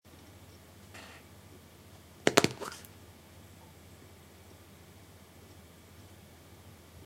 Sound of opening a "snusdosa" Swedish tobacco.
Recorded by the inbuilt mic in an Imac
Snus, Swedish, Internal, tobacco, Click, Imac, Open, Snusdosa, mic, Opening